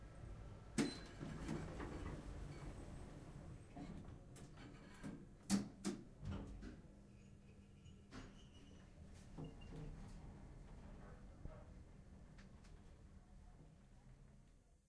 The sound starts when the elevator's doors are opened and closed and finishes when it starts going down. Since it is an sliding door, it starts grazing, a deep sound and a higher one when it comes down. It was recorded at UPF (Poblenou's campus) at 52 building.
building, elevator, campus-upf, UPF-CS13